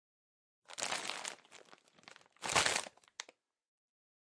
Sound of shoping in litle store. ( crackles....)
Pansk, CZ, Panska, Czech